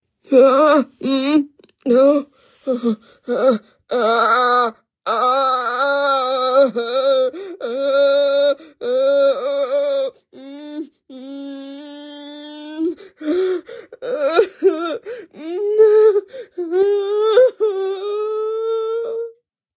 Woman moaning in pain